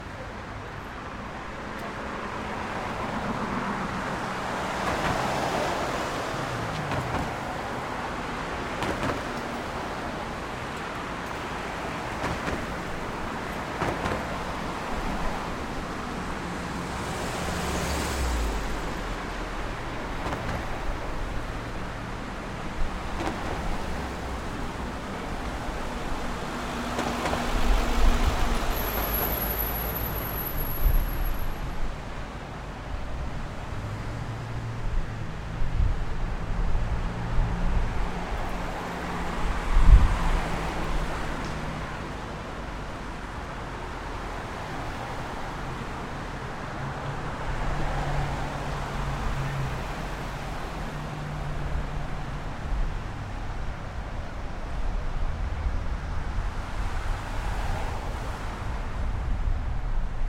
City Street Traffic 03
Afternoon rush hour traffic on a four lane city road recorded from a roof balcony.
Rode M3 > Marantz PMD661.